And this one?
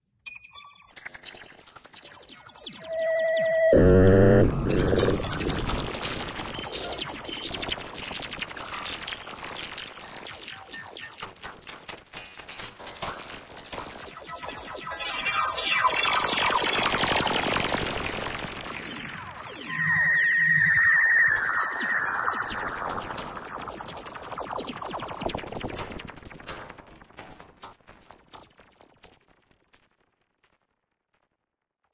Recorded as part of my mus152 class with my friends Andrew and Kevin. On a zoom h6 an sm57, an at2020 and a lousy amp.